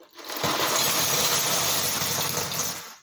LEGO POUR 02
Pouring a box of Lego onto the floor
Lego, Move, Box, Floor